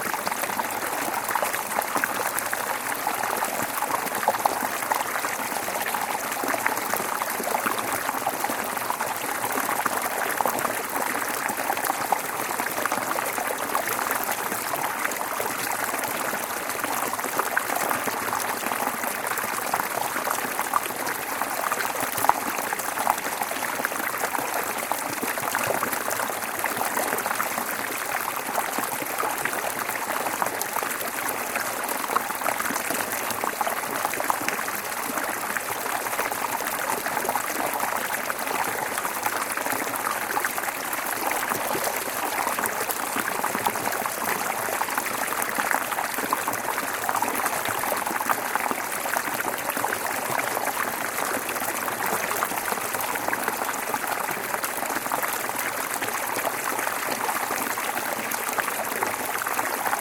Water stream 1
One in a series of smaller water falls from a stream in the woods. Water is pouring down in between some rocks.
unprocessed, field-recording, stream, Water, water-fall